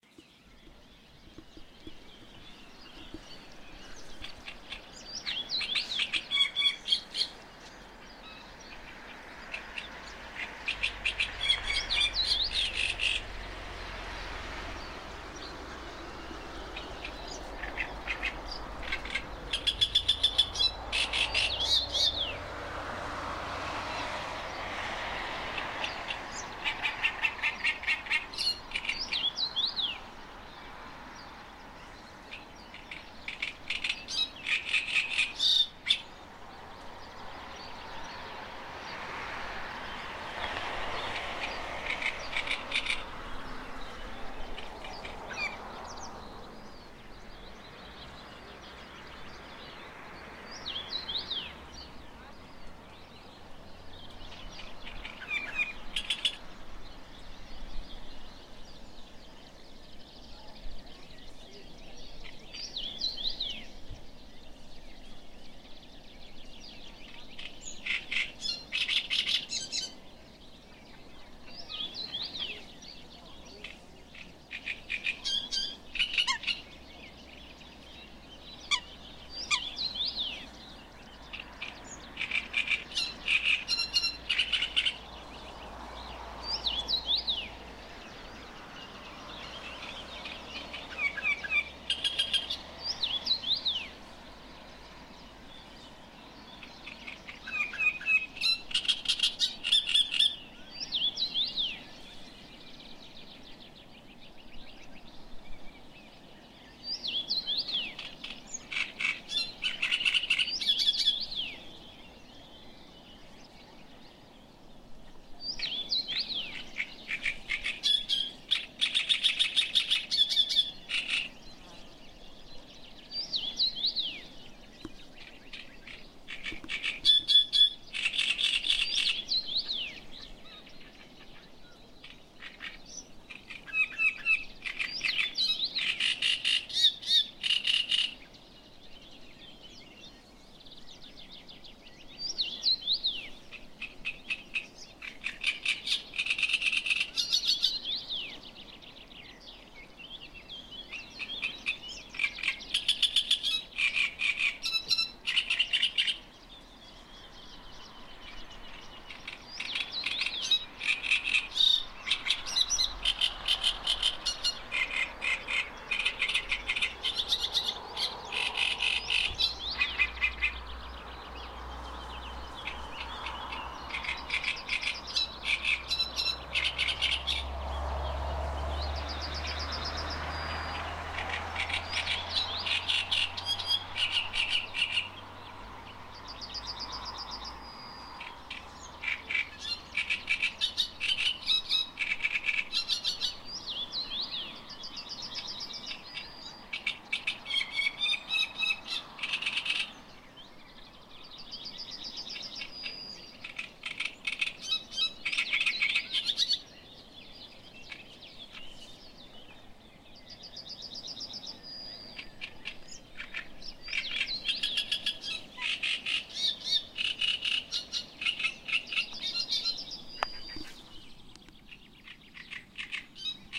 reed singing in canes
birds, reed, sing